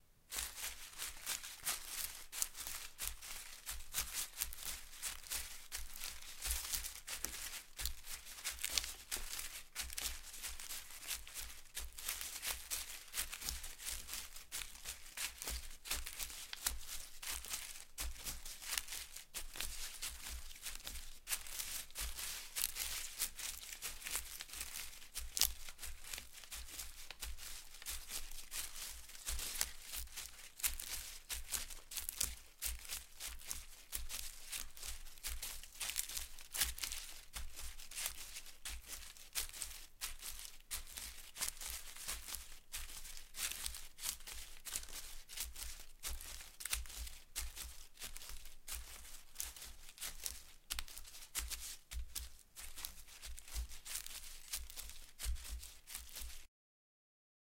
Animal footsteps on dry leaves (forest floor). Recorded with Samson G-Track.
dog, foliage, walking